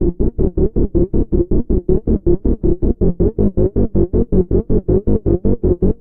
080bpm SynthLoop
080bpm, Loop, Synth